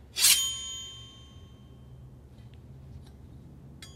Sword Slice 15
Fifteenth recording of sword in large enclosed space slicing through body or against another metal weapon.
movie, slice, sword, foley, slash, sword-slash